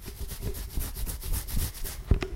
Morris head scratch
A man scratching his head.
head, scratch, scratching